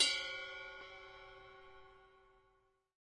rides - bells, ride, bell, dw, ludwig, yamaha, tama, crash, cymbals, drum kit,
drums, percussion, sabian, cymbal, sample, paiste, zildjian, pearl
pearl, kit, ludwig, bells, rides, drum, dw, crash, ride, cymbals, cymbal, paiste, yamaha, drums, tama, sample, sabian, zildjian, percussion, bell